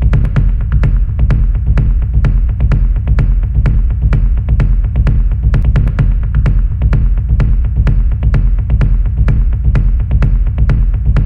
Experimental Kick Loops (6)
A collection of low end bass kick loops perfect for techno,experimental and rhythmic electronic music. Loop audio files.
dance, sound, groove, rhythm, end, loop, design, percussion-loop, groovy, 4, rhythmic, percs, drum-loop, drum, kick, beat, Low, bass, Techno, 120BPM, BARS, 2BARS